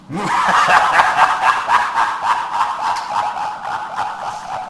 laughter-male-modulated-wet-evil-throaty-sinister 029-030 030-046 042-100-1
Male voice, laughter sounding sinister. Echo, reverb, and bit-crush applied. Sounds sharp and wet, kinda bubbly.